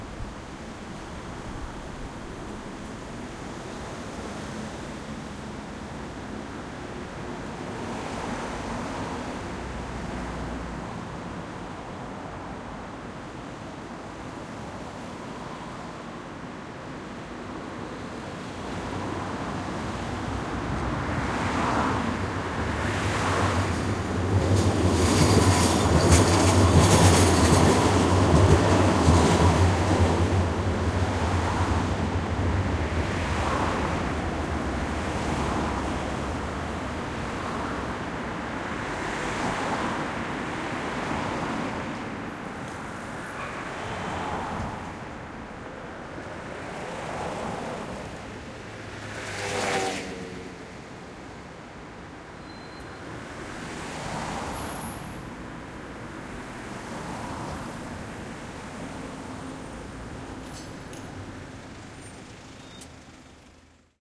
City ambient 01
town, field-recording, ambience, noise, ambient, city, traffic, stereo, street